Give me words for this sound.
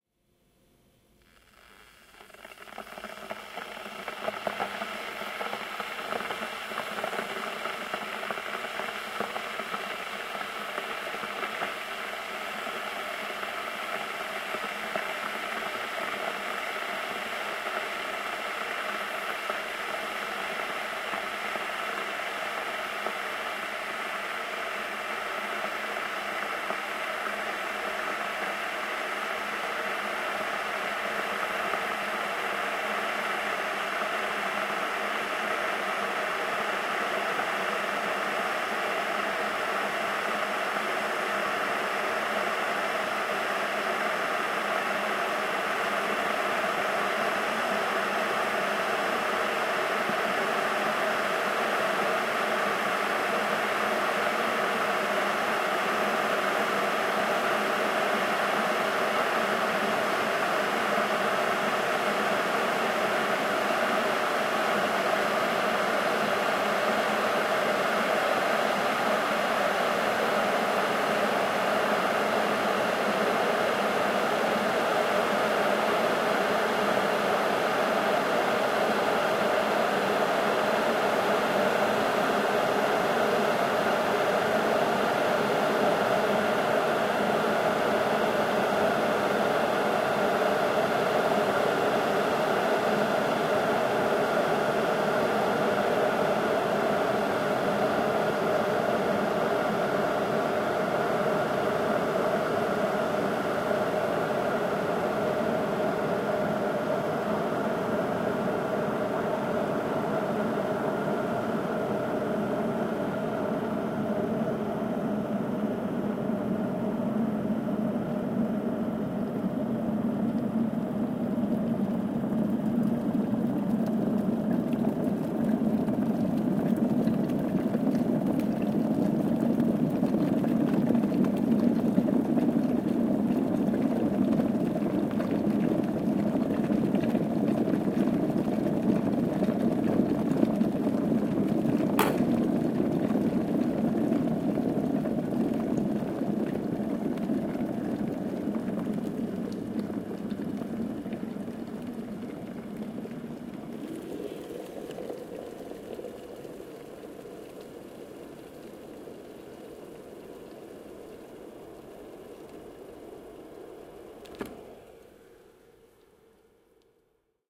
Electric kettle boiling water